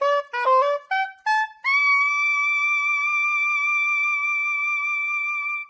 Non-sense sax.
Recorded mono with mic over the left hand.
I used it for a little interactive html internet composition:
soprano-sax, soprano, sax, loop, melody, saxophone